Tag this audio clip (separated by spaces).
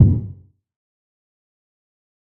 lead resonance noise blip club synth dance sound random effect processed porn-core sci-fi dark rave techno 110 glitch-hop hardcore acid house bpm synthesizer electronic bounce glitch electro trance